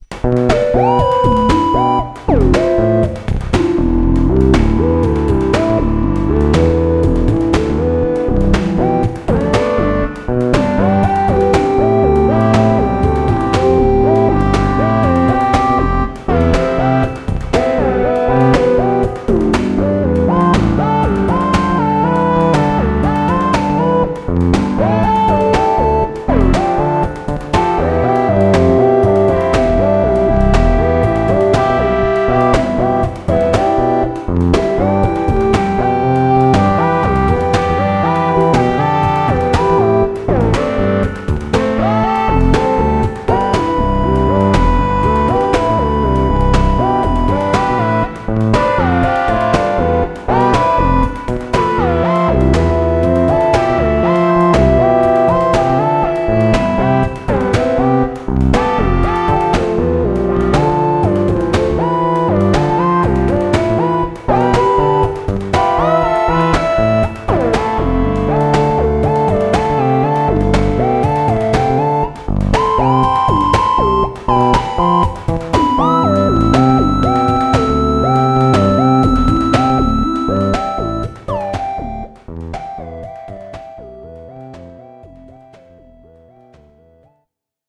Output from an Analog Box circuit I built (a jambot): all sounds, instruments, controls, rhythms, etc., are performed by the native circuit itself. I did mix and perhaps do a bit of mastering in Cool Edit Pro afterward, but otherwise the FX are also done by the Analog Box circuit. This is actually a mix from 2 or 3 different variations starting with the same random seed. Toward the end, the version with the "vocoder" (emphasizing some formant frequencies chosen at random) wasn't quite that long so I faded it out, so you're left with a different sound there. This isn't perhaps long enough for some purposes, but I have the circuit handy and could generate whatever length anyone requires. Consider this a teaser. Sure, it's not quite what you might get out of a truly musical automaton like Band in a Box, but hey, this is a low-level circuit! I thought the overall sound of this was unusual enough to deserve a listen, so I posted just a piece of it.
synthetic, music